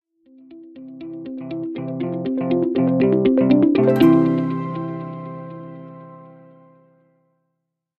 Corporate Rise-and-Hit 03
Corporate Rise-and-Hit logo sound.
corporate, logo, rise-and-hit